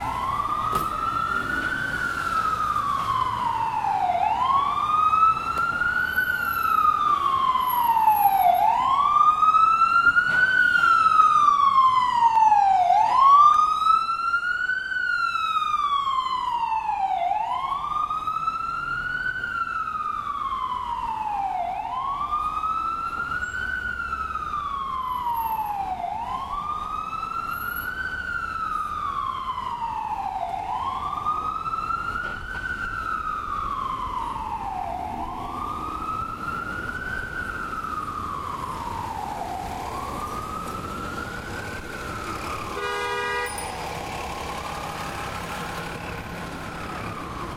Manhattan's street captured sound of ambulance siren
Recorder Zoom H2n
new, nyc, siren, street, york